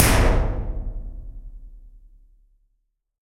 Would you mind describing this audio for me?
This sound has been made with 2 hits on a lift door recorded close with a KM185.